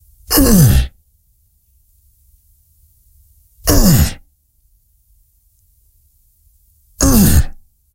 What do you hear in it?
Male Grunts
Recorded with Sony HDR PJ260V then edited with Audacity